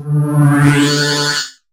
A squiggly short synth lead sample.
Digitally synthesized with LMMS & Audacity.